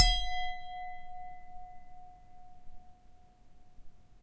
large glass b 1
Single hit on a large wine glass.
oneshot, percussion, hit, glass